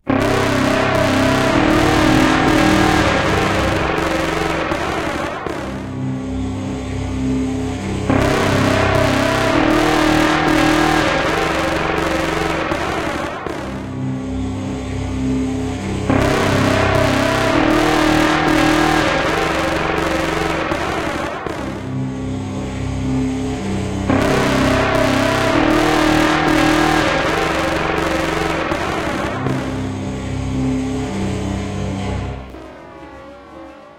A three note bass line with noise. Principle notes are C, D#, F#. This means it doesn't easily fit in a major or minor key, but it would work in something largely in C minor or F# minor. This sample has a tale that fades out.
Electronic, Noise, Music, Bass